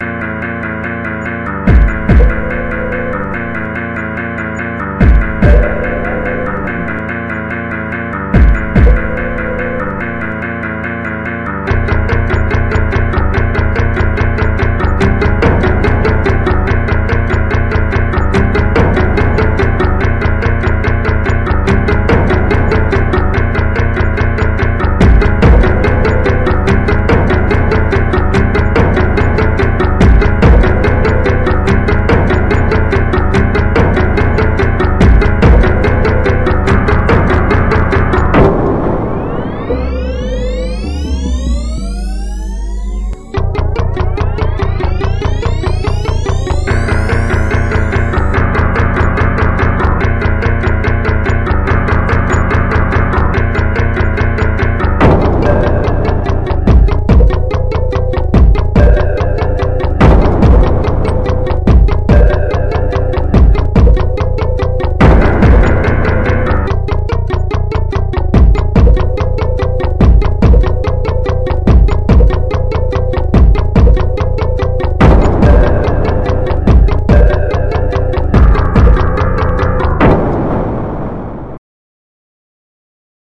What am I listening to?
The killer is coming for you
I made a short music sample (created in LMMS).
sinister, halloween, nightmare, fear, psycho, evil, creepy, killer, scary, spooky, paranormal, demon, drama, ghost, murder, horror, lmms, fearful, chase, stress, haunted, devil, shark